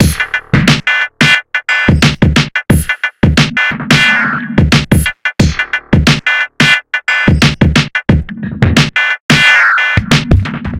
abstract-electrofunkbreakbeats 089bpm-cosmos
this pack contain some electrofunk breakbeats sequenced with various drum machines, further processing in editor, tempo (labeled with the file-name) range from 70 to 178 bpm. (acidized wave files)
abstract, beat, breakbeats, dance, distorsion, downbeat, downtempo, drum, drum-machine, electro, elektro, experiment, filter, funk, hard, heavy, loop, percussion, phad, reverb, rhytyhm, slow, soundesign, syncopate, techno